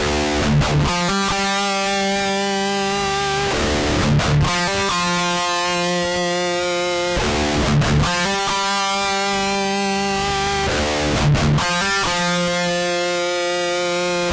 REV GUITAR LOOPS 16.2 BPM 133.962814
13THFLOORENTERTAINMENT, 2INTHECHEST, GUITAR-LOOPS